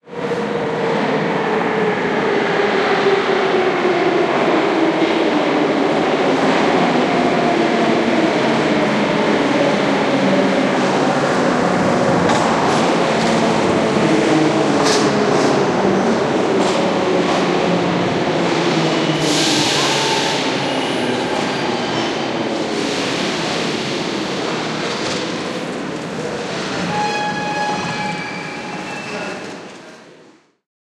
Subway train arrive. St.-Petersburg. Russia.
Recorded: IPhone 5S.
Mastering: Logic Pro X